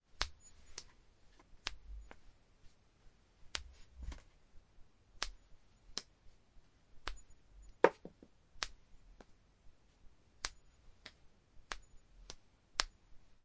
Raw audio of the flipping of a 50 pence coin, also including the sound of catching it or letting it land on a surface.
An example of how you might credit is by putting this in the description/credits:
The sound was recorded using a "H1 Zoom recorder" on 22nd March 2016.